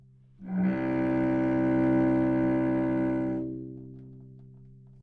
Cello - C2 - other
Part of the Good-sounds dataset of monophonic instrumental sounds.
instrument::cello
note::C
octave::2
midi note::24
good-sounds-id::261
dynamic_level::mf
Recorded for experimental purposes
C2, cello, multisample, single-note, neumann-U87, good-sounds